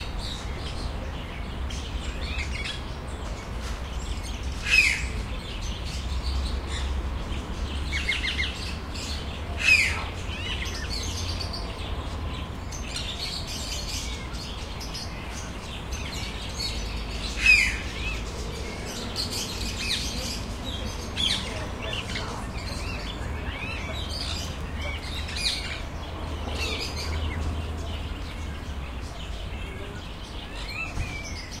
In the small zoo at summer. Park naturalists. Center of city. Tweet. Noise of cars and tram on the road. Somebody talks on the mobile phone. Sound of thunderstorm incoming.
Recorded: 25-07-2013.
XY-stereo.
Recorder: Tascam DR-40
atmosphere, birds, ambient, ambience, soundscape, city, background-sound, ambiance, field-recording, mammal, town, noise, zoo, animal